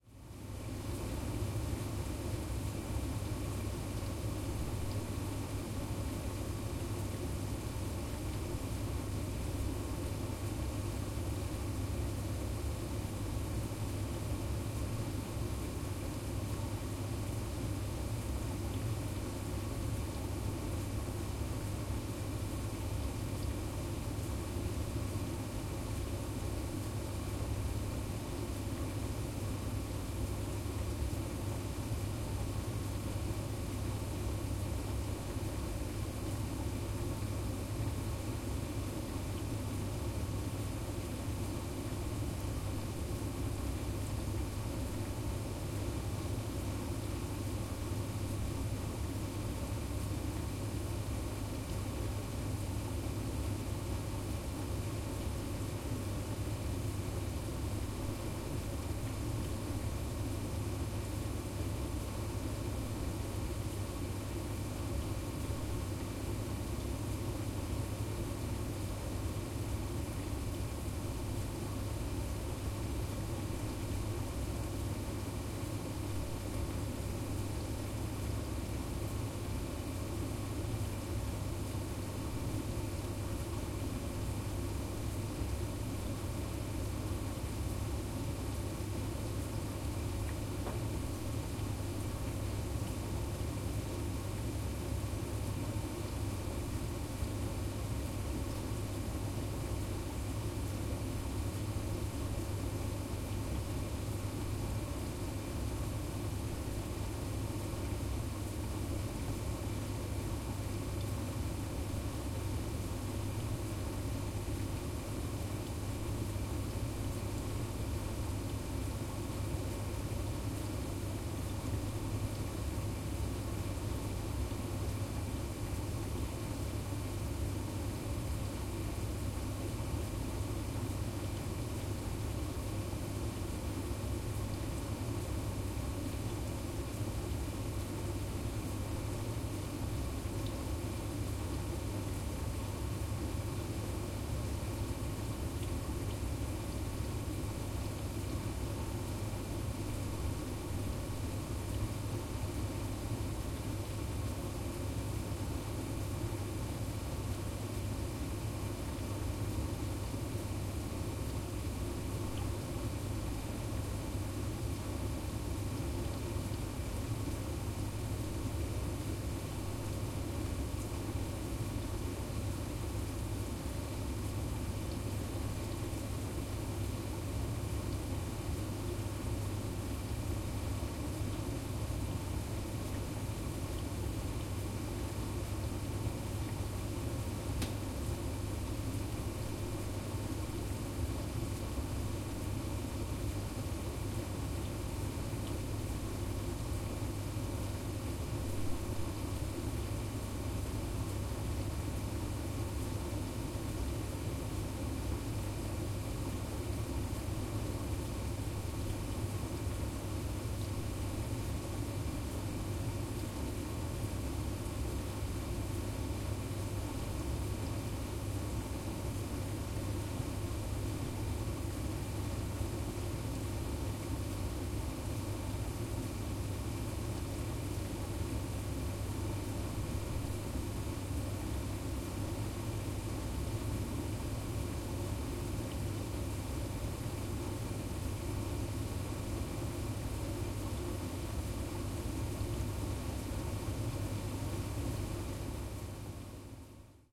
Drone of a household appliance. (New Zealand)